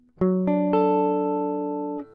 guitar arrpegio 2
This is some usefull guitar arpeggio what I was recorded on free time..